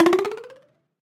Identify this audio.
Pringle 3 - Ascending
hitting a Pringles Can + FX
delay, percussion, resonant, metallic